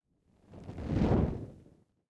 23 FIACCOLA PASS
effects, fire, flaming, torches, flame, fireball, fiaccola